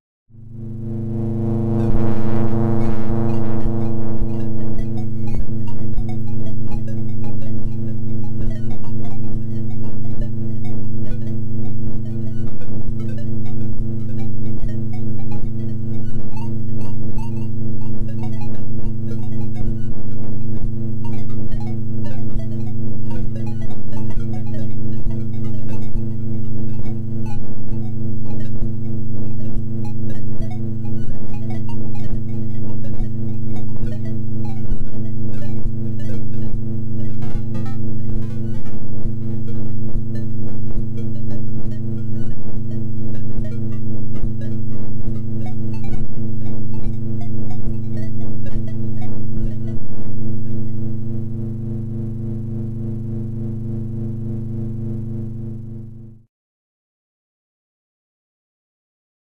virtual serverroom
Made from three different synth software recordings. Joined and edited in audacity.
linux, software, synth